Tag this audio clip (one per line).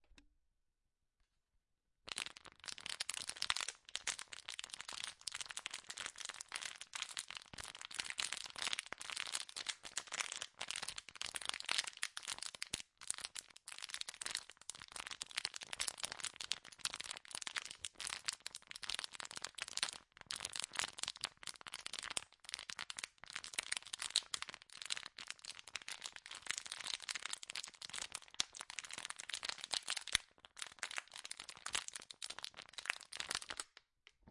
Bottle
Crackling
Water
ZoomH1